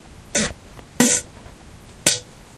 fart poot gas flatulence flatulation